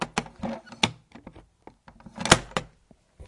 Closing a large metal latch
buzz, latch, machine, mechanical, whir